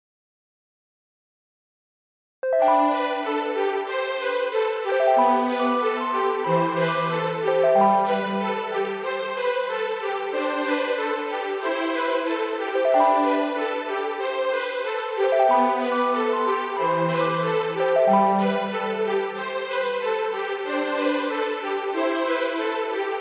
Synth electronic loop
keyboard, a, strings, synth